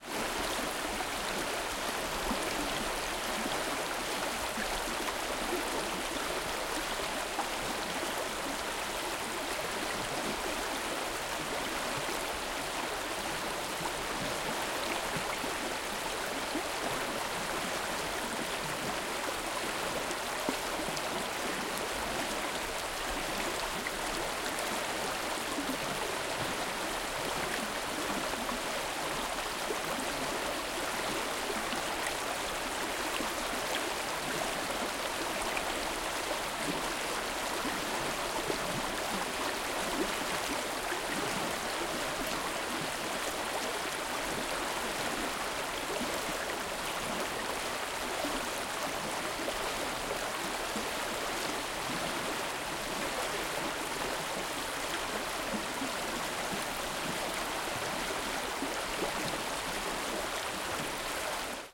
River rapid Camp Blommaberg 1
Recording of a small rapid in the river Voxnan in Sweden.
Equipment used: Zoom H4, internal mice.
Date: 14/08/2015
Location: Camp Blommaberg, Loan, Sweden
Rapid, Water